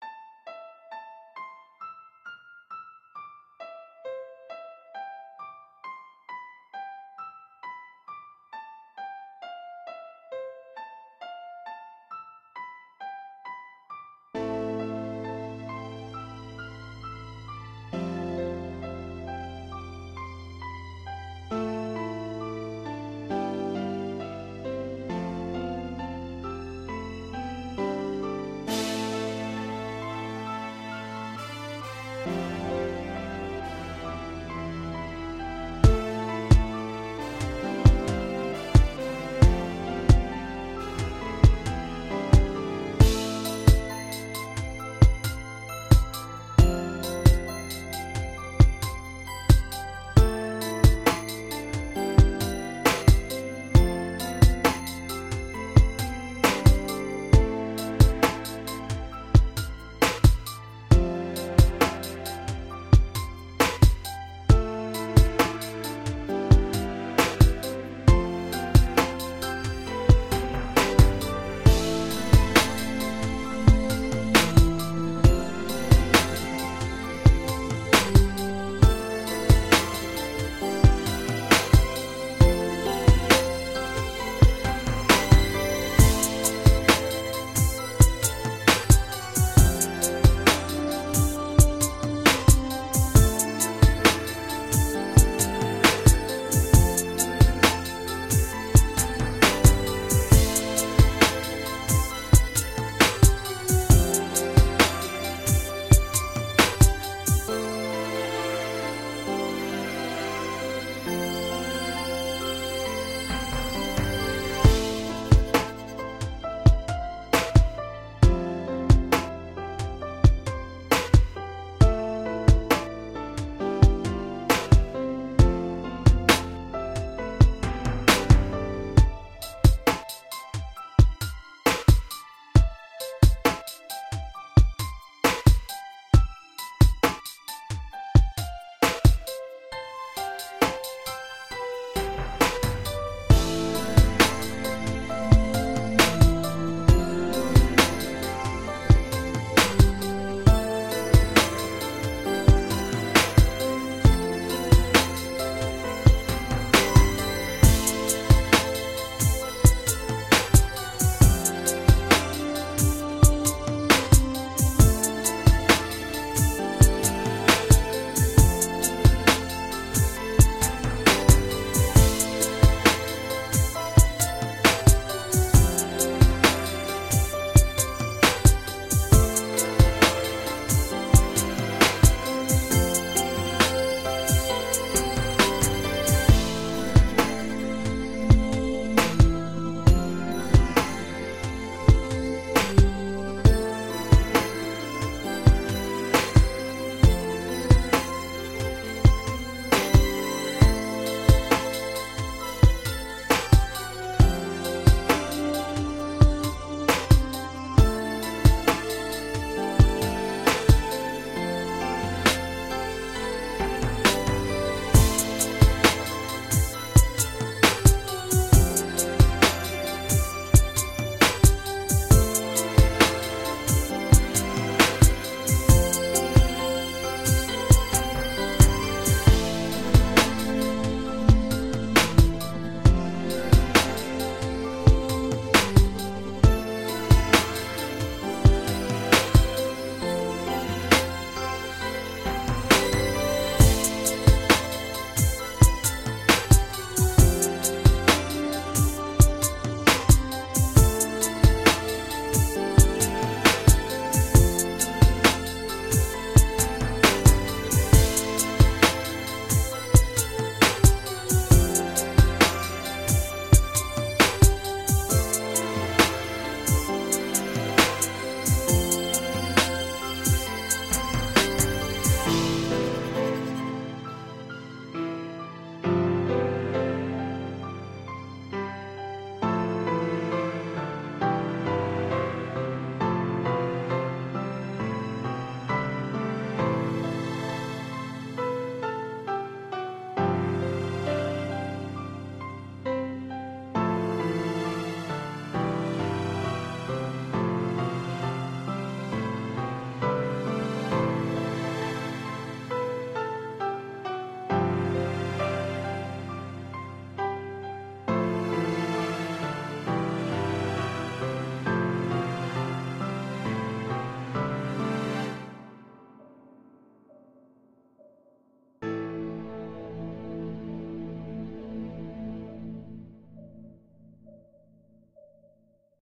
Bewitched - Dark Hip Hop Music
This is a hip hop beat with a dark twist. Would work great for cinematic use in a horror or action film to build tension and the feelin of horror! Created in FL Studio
background, hip, hop, beat, rainy, scary, dance-music, RB, thunder, music, dance, dark, rap